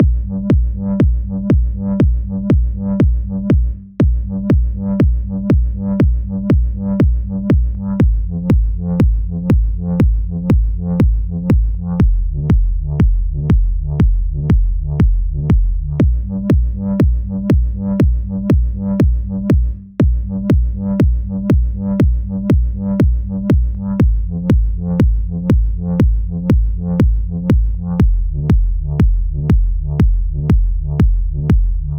Kick and Bass Techno 1
Kick and Bass Techno loop
dance, electronic, kick, techno